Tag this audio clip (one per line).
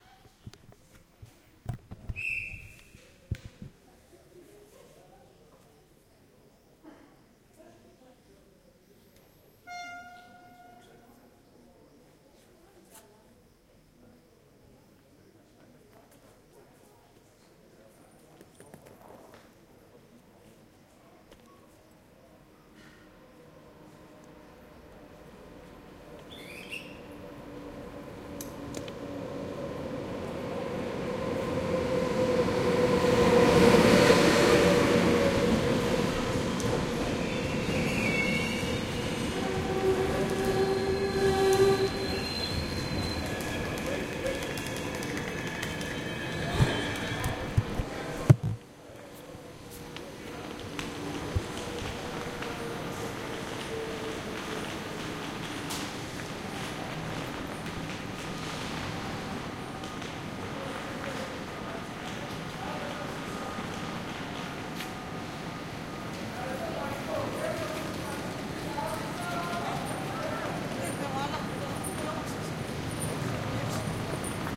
passing
steam-locomotive